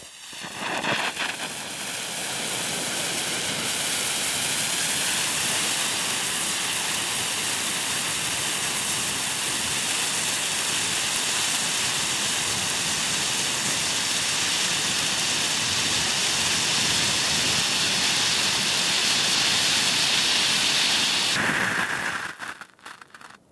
13GBernardD vulkan
Zábavný vulkán (pyrotechnika)